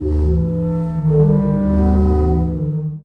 Giant breathing 9
One in the series of short clips for Sonokids omni pad project. It is a recording of Sea organ in Zadar, spliced into 27 short sounds. A real giant (the Adriatic sea) breathing and singing.
breathing, field-recording, giant, sea-organ, sonokids-omni